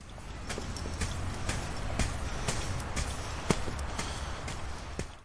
This is the sound produced by a person running in the beach.
It has been recorded in Masnou beach using a Zoom H2